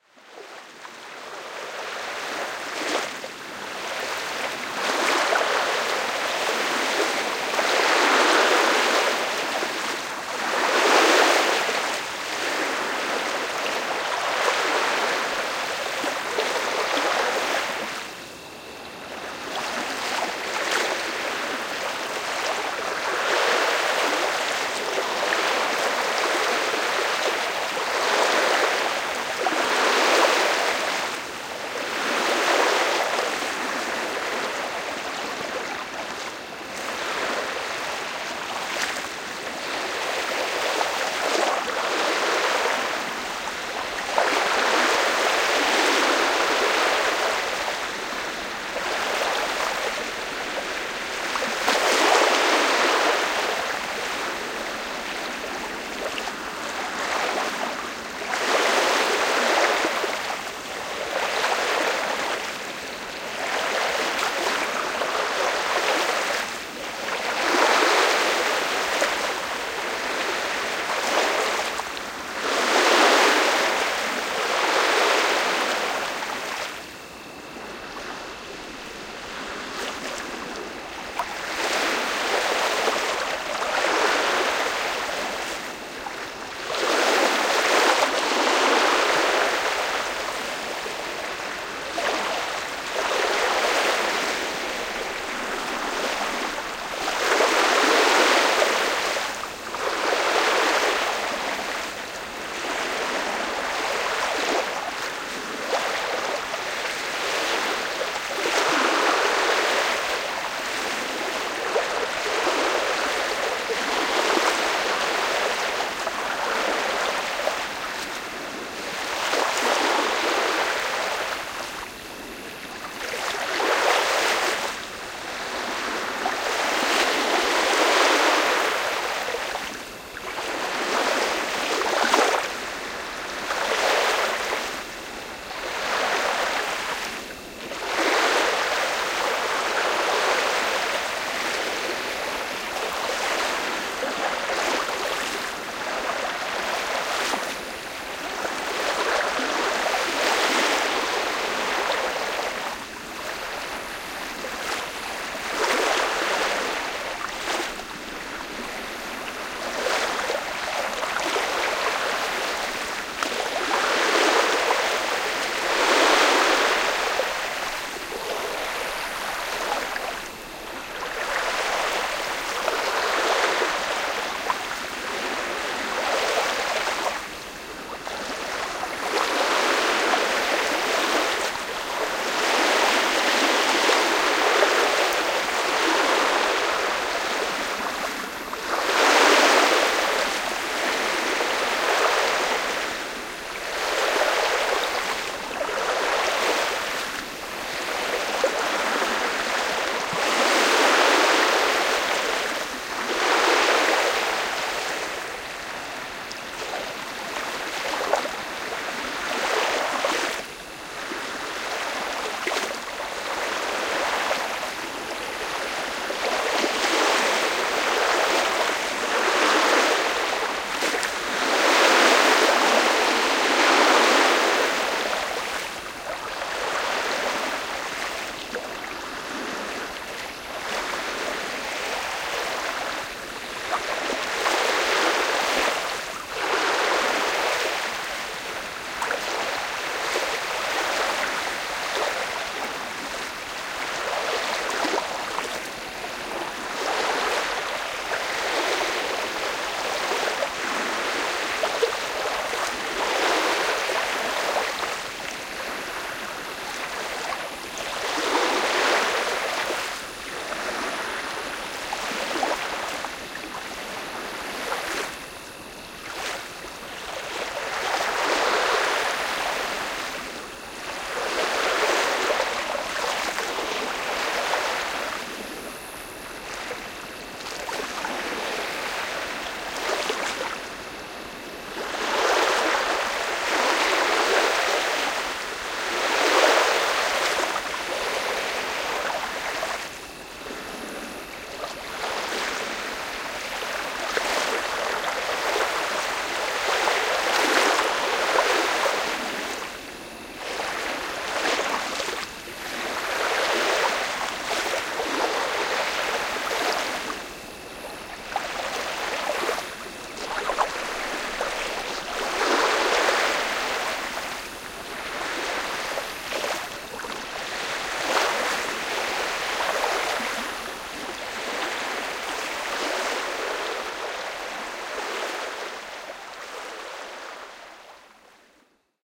Waves-BlackForest-Mst2-1644
Recorded waves on the shore at lake Sam Rayburn in the Black Forest community on April 4, 2010, around 7 to 9 pm. Used a Marantz PMD661 with 2 Rode NT1A mics. Had issues with a *lot* of boats on the lake and several airplanes passing over. Tried a new approach in post processing with using side-chained dynamic eq plugins to handle frequency pegs from boats and planes alike. Figured it would be nice to just drop those frequencies down a good bit when they peak over a certain level, then let everything come back up when the pegs disappear. Worked like a charm. Very interesting ways to handle pegged frequencies instead of trying to hammer them all down individually with narrow-band EQ for small periods of time. Anyhow, side-chained dynamic eq seems to more efficiently let the sounds "breathe" as the airplane and boat motors change frequency and intensity. Not much less headache than hammering all the pegs down manually, but it certainly sounds more fluid for the most part.